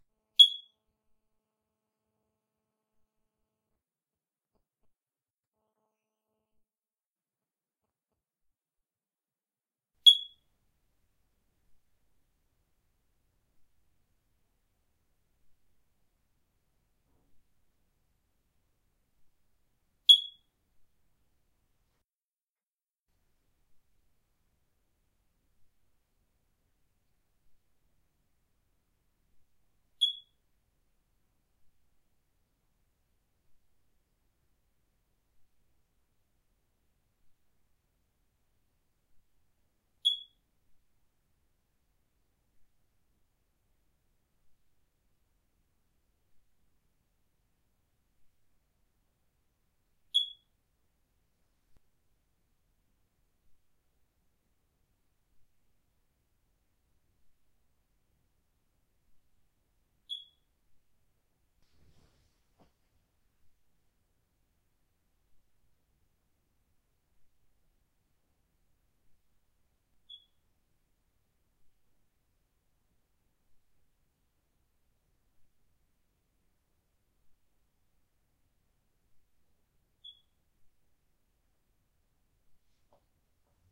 Smoke alarm battery low
Recorded from different distances on my Zoom H2n and noise reduced using Audacity. Gaps between chirps also reduced to about 10 seconds.
smoke-alarm, battery-low, chirp